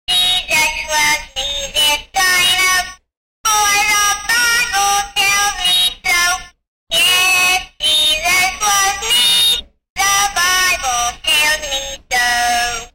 An animatronic Easter toy singing, in a young boy's voice, "Jesus loves me yes I know / for the Bible tells me so / yes Jesus loves me / the Bible tells me so".